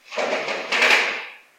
weird-sound
Weird strange sound. Visit the website and have fun.
experimental, weird